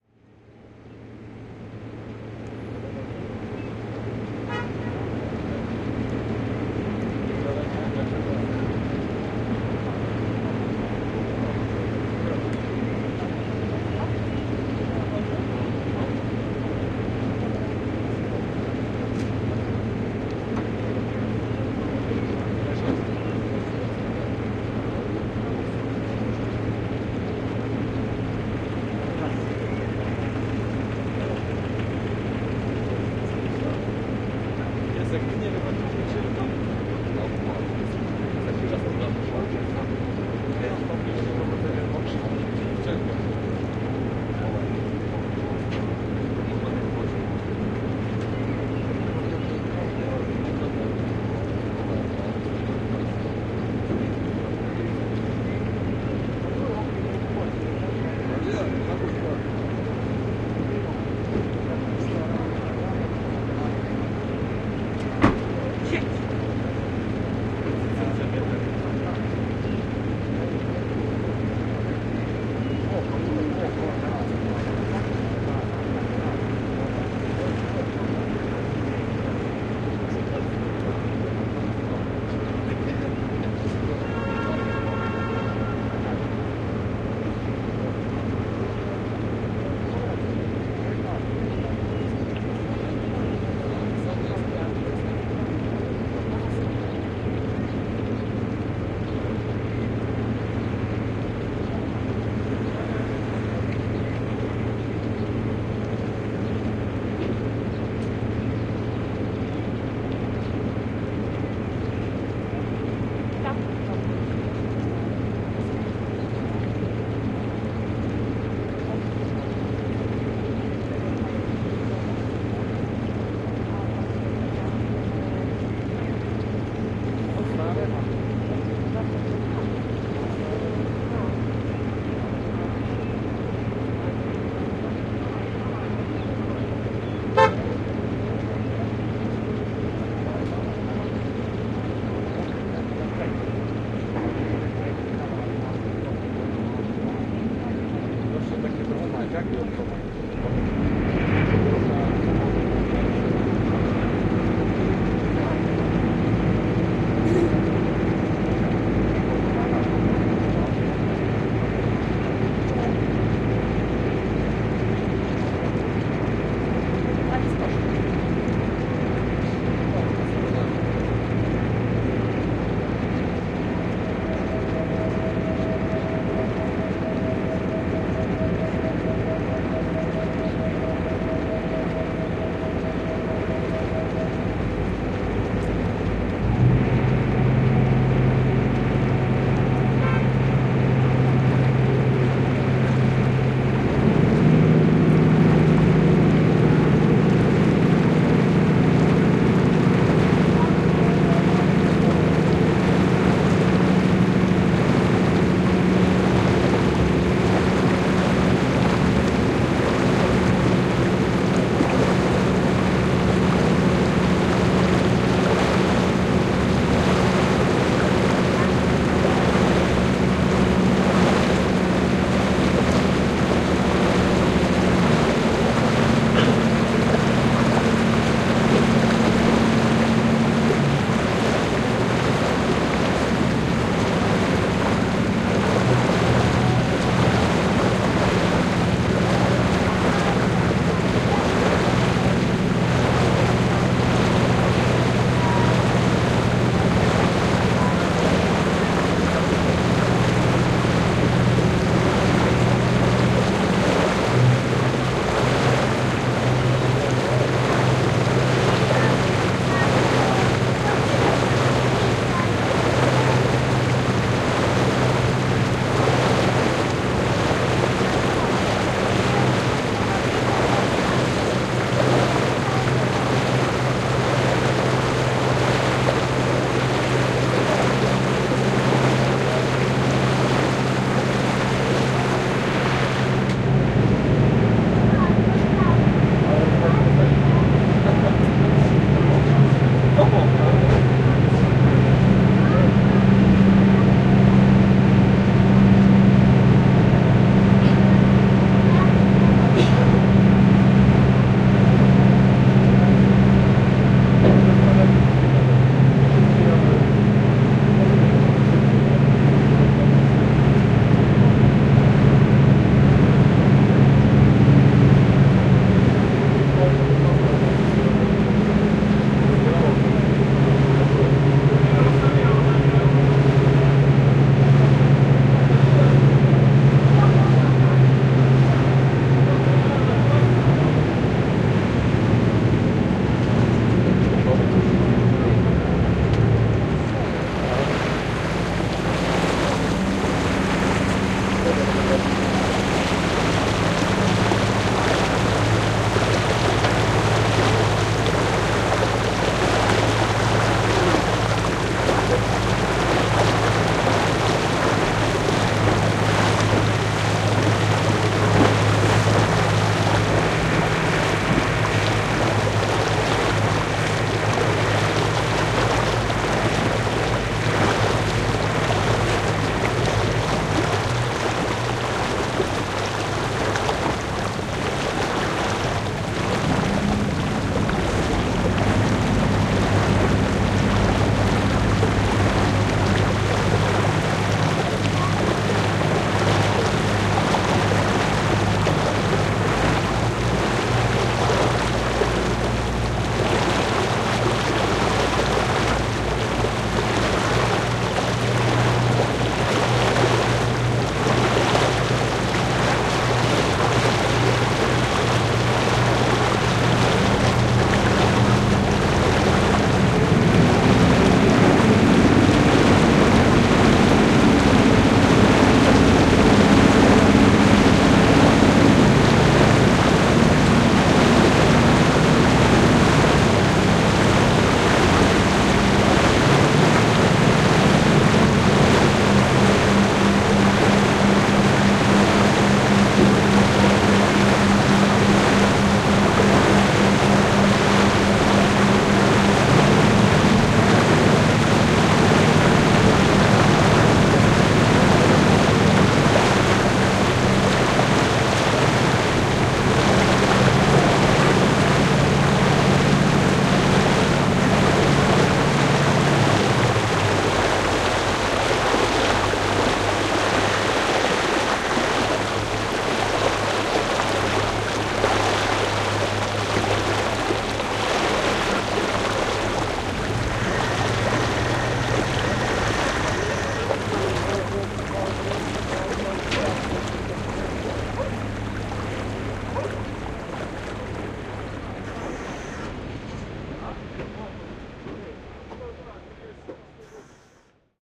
ferry Kamenari Lepetane 08.05.16
08.05.2016: short journey through the ferry from Lepetane to Kamenari. Recorder marantz pmd661 mkii + shure vp88 (no processing).